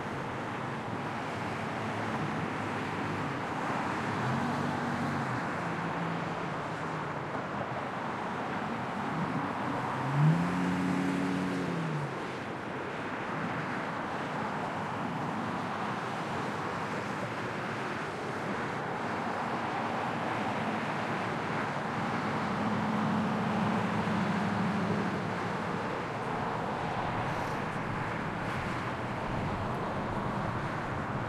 Field recording made at the south side of the Lincoln Memorial in Washington D.C. Recorder is facing the Tidal Basin to the southeast, with Ohio Drive and it's traffic in the mid-range.
Recording conducted in March 2012 with a Zoom H2, mics set to 90° dispersion.
afternoon, athmo, atmo, busy, city, field-recording, mid-range, spring, traffic, urban, USA, Washington-DC